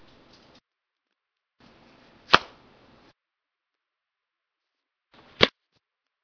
cell phone holster at home
Opening and closing a cell phone holster
cell, cellphone, dare-12, holster, phone